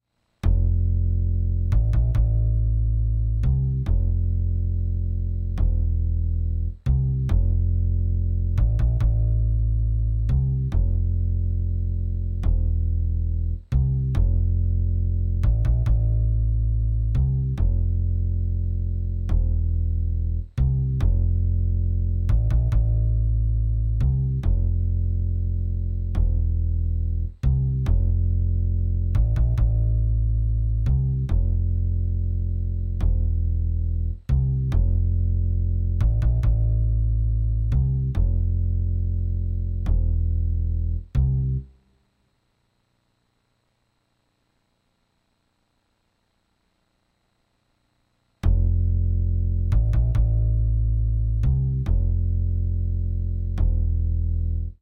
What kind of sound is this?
HH140 Bass Groove
A Bass groove I used in a recent track; at 140BPM.
Acoustic, Groove, Bass, Snickerdoodle, Hop, Keys, Tight, Bottom, Hip, 140, BPM, Funk, Synth, End, Beat